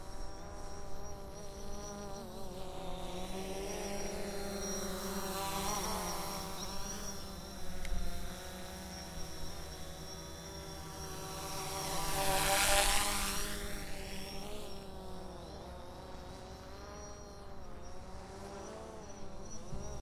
UAS Drone Flying 01
Field recording of a Phantom 4 Pro drone flying.
4, Drone, field, flying, Phantom, Pro, recording, sound